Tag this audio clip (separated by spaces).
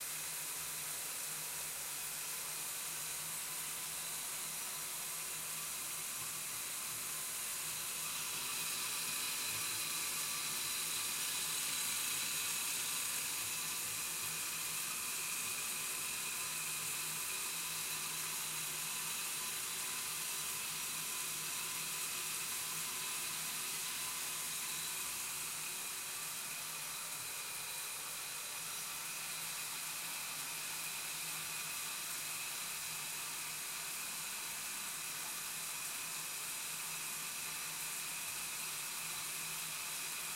bathroom shower water